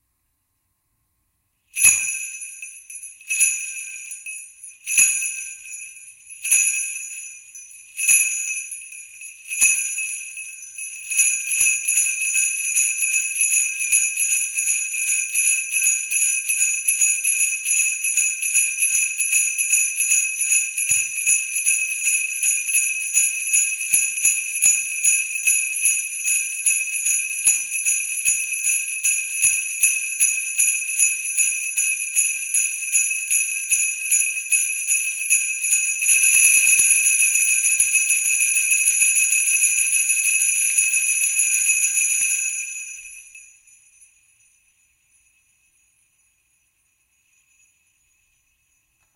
Sleigh Bells 154BPM
Sleigh Bells recorded at "Sleigh Ride" tempo.
Mono
Six 4/4 bars of downbeats. Then Sixteen 4/4 bars of quarter notes. Finally Four 4/4 bars of shake and decay.